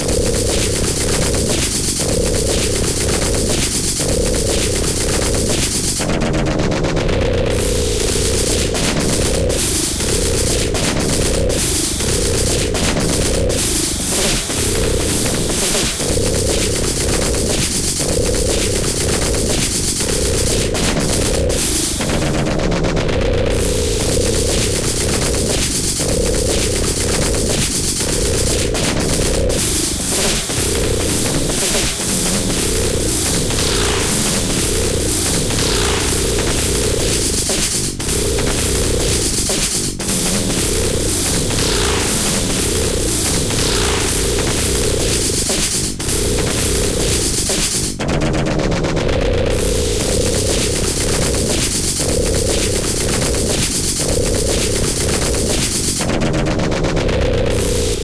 Right from the bowels of the cesspool behind your imaginary house in hell I bring you..... GoBliN DrUMz !!!